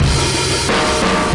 let-it-go break3
Drum recording from live session with Fur Blend - 2 Mic recording onto 3M M79 2" tape at Greenmount Studios